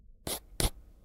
Pen on Paper 01
Pen on paper.
{"fr":"Raturer 01","desc":"Raturer au stylo à bille.","tags":"crayon stylo rature"}
ball, paper, pen, scribbling, striking